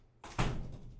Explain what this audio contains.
Door Slam
bater, Door, porta, Slam